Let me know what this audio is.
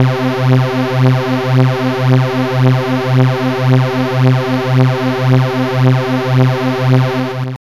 Detuned sawtooth waves
saw, detuned